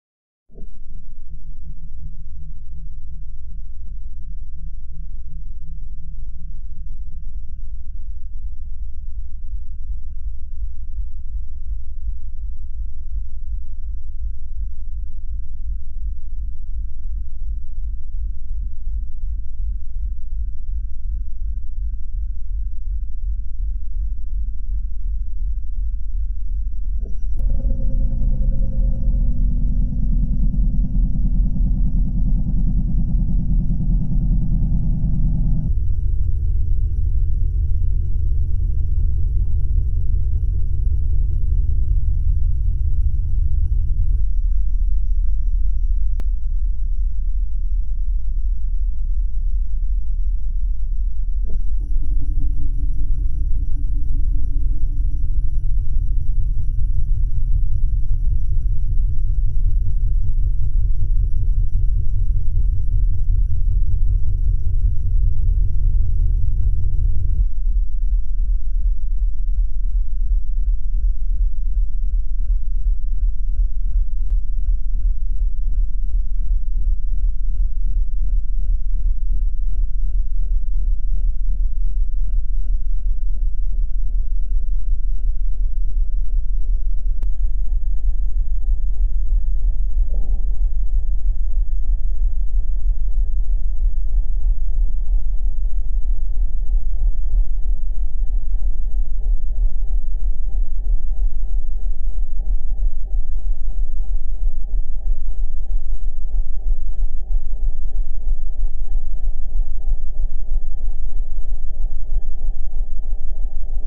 Hi all, here is a easier-to-handle version of M/S Emma Maersk' machine room. Enjoy.